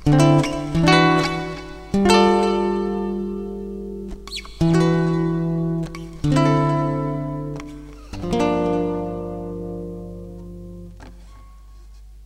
slow, dreamy, 7th chords played on a nylon string guitar.
nylon, guitar, chord, seventh